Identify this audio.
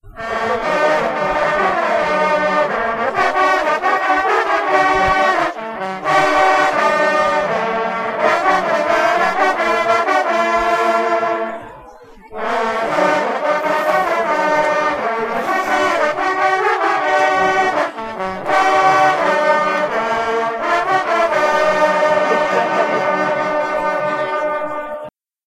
hunting horn players team registered at a hunting horn contest in Montgivray (France)
france, horn, hunting, traditions